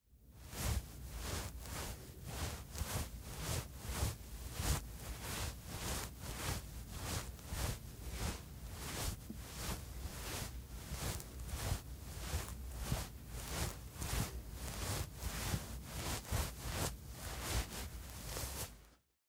Human ClothPass Walking 2
Some cloth foley for a human walking.